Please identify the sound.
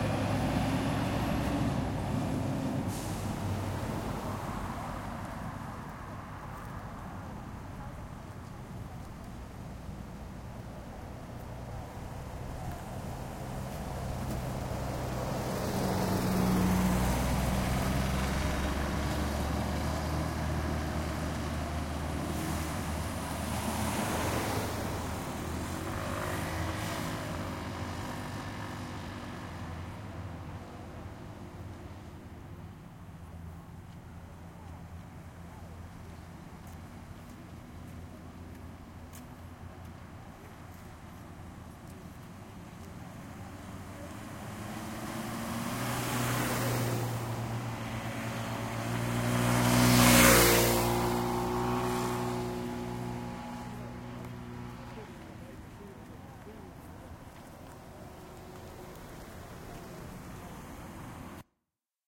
Recording of a road side with light traffic ambiance.
car, ambience, bus, road, cars, street